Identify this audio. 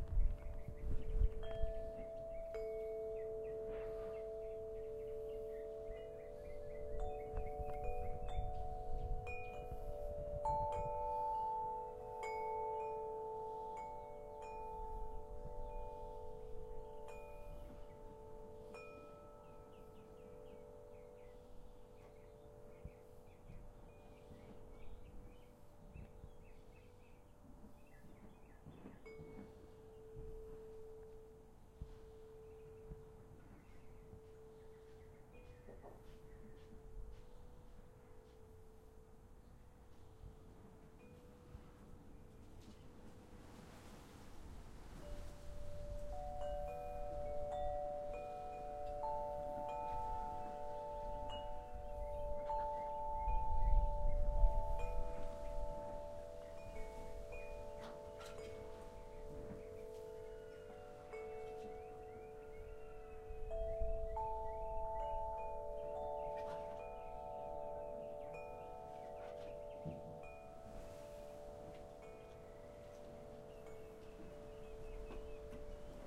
Wind Chimes 02
Gentle wind chime recording with rich, deeper pipes with a soft wooden hammer. Completely wind driven recording.